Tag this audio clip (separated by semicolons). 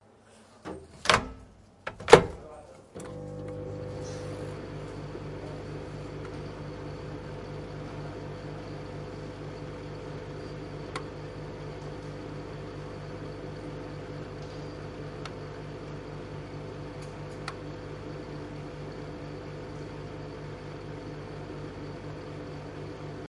beep; beeping; electronic; microwave; start